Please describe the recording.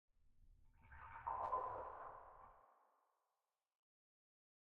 Deep sea creature noise, ambient sound of the deep
A deep sea water sound.
ambient, creature, sea